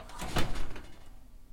Oven Door open 2 inches
opening an oven